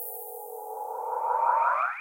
Another laser type sound.